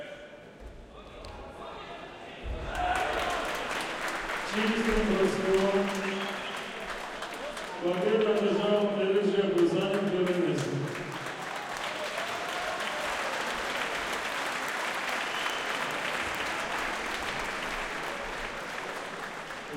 brosok+sudya+hlopki
sport wrestling "KURESH" cheers applause stadium